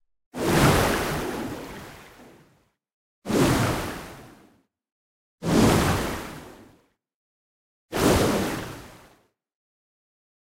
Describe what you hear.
Water Whoosh
swash, woosh, swhish, swish, swosh, water, whoosh